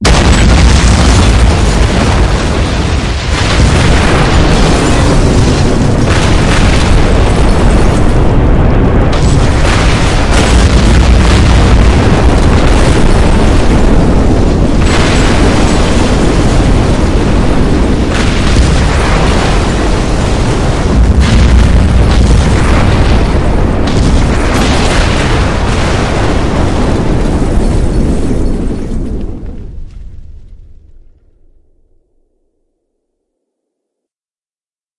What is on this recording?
A must have for anyone who has wanted a long explosion beyond 1 or 2 seconds.
destruction, bomb, boom, explosion, war, military, massive, blast